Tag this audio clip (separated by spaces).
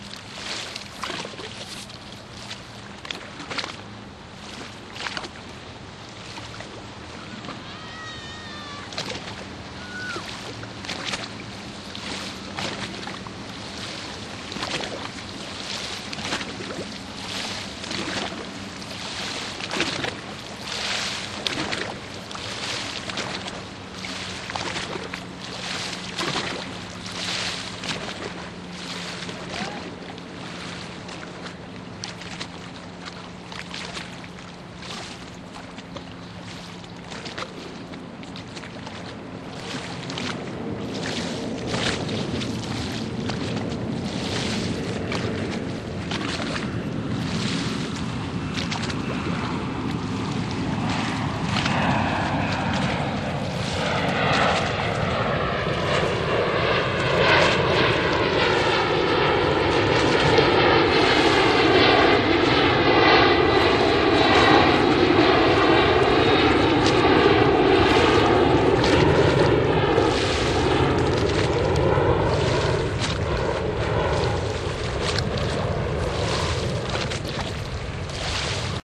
field-recording road-trip summer travel vacation washington-dc